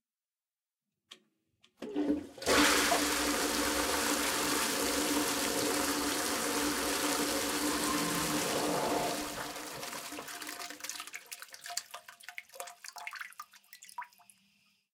Toilet Flush recorded with portaDAT
environmental-sounds-research, toilet, flush, water